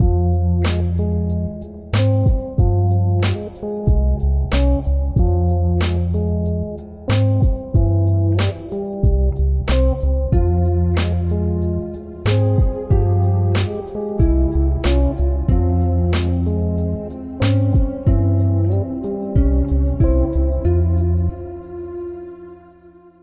A New Sense Sample
Made on FL studio 11
Written and Produced by: Nolyaw
Sample taken from "A New Sense" link below
Tempo: 93bpm
If you do use these samples, just remember to give me a shout out.
1love_NLW
mastereo, instrumental, recording, music, original